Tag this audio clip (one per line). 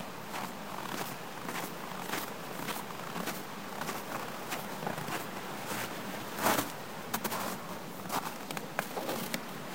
Morning
Footsteps
walking
Snow
field-recording